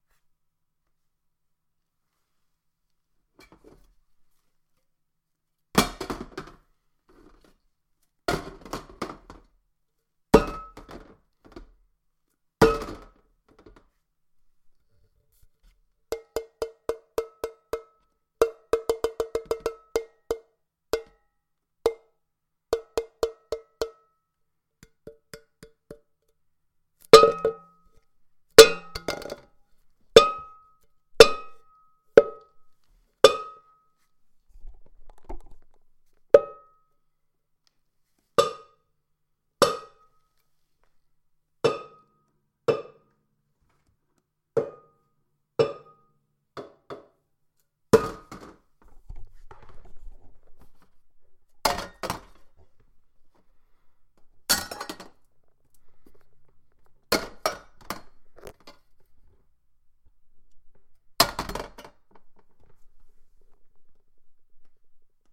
Metal cans - clinking
Metal cans being thrown into a plastic recycle bin.
clink, can, clinking, clanking, percussion, hit, aluminum, cans, metallic, tin, clatter